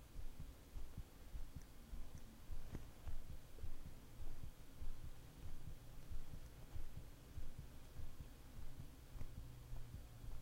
Heartbeat Real
A faint recording of my heartbeat. It's quiet but it's there. Used a shure pg81
heartbeat, blood